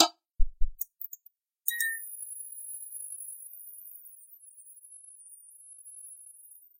Fluorescent lamps switched on in a medium sized room. Nice clicks and very high pitched squeak as they're coming to life.
switched-on, fluorescent-lamp, light, switch, high-pitched